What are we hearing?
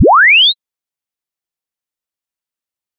Made with Audacity.
chirp, freq, long, sweep, three-seconds